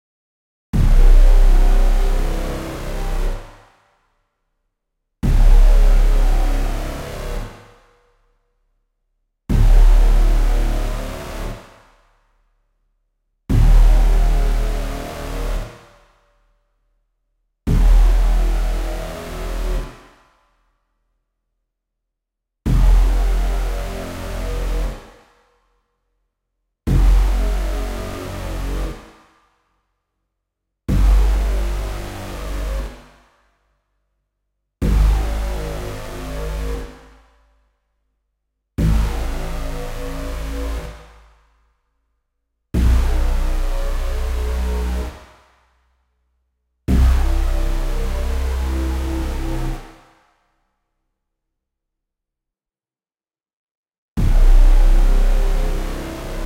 Growling Synth
The booms/growls/roars, what ever you like to call them, in this file goes from C to C in case you want it to be key spesific.
sfx, synth, Monster, growl, roar, boom, sample